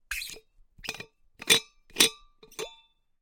The lid being unscrewed from a metal drink bottle and removed